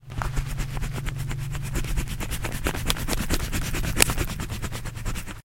Erasing pencil writing on paper with the eraser on the end of a pencil